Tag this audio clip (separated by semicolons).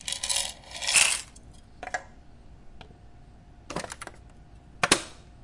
coffee; kitchen; cooking; field-recording; domestic-sounds